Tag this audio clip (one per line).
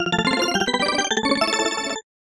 8-bit
8bit
Achievement
Game
Jingle
Nostalgic
Old-School
SFX
Video-Game